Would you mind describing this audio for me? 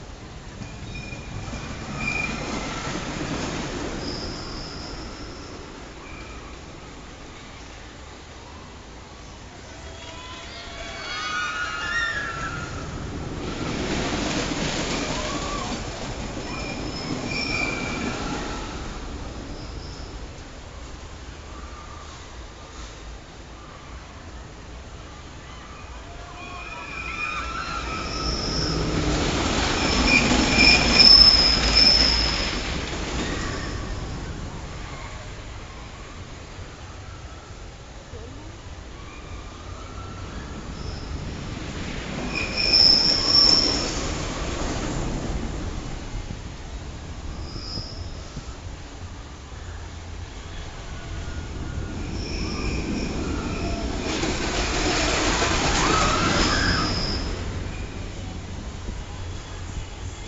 cyclone coneyisland
This is a recording of "The Cyclone" wooden rollercoaster at Coney Island, New York.
coney, nyc, new, island, city, york, rollercoaster